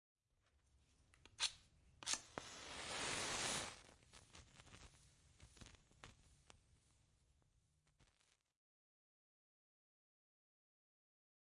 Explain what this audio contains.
Playing with matches
Sony PCM D100